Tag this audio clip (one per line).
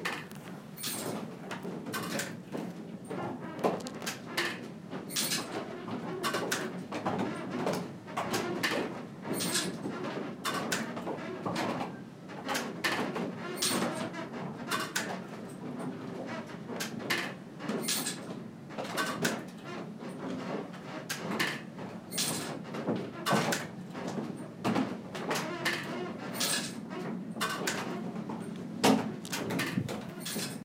grating
windmill
works
squeaking
industry
chains
crunchy
spinning
crunching
rattling
factory
creak
iron
industrial
creaky
crunch
metal
machine
machinery
creaking
manufacturing
squeak
rattle
wood
crush
squeaky
mill